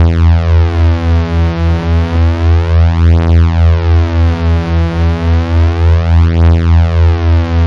A reese made with milkytracker by saw and sine waves
reese, saw